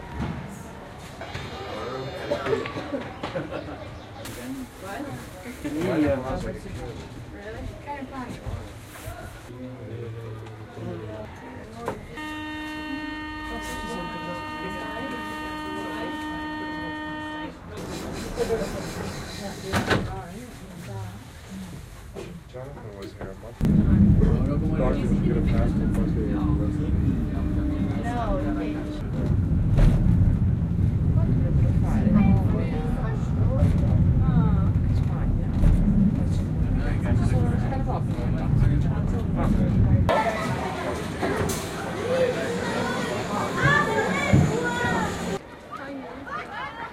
Paris Funicular, outside and inside.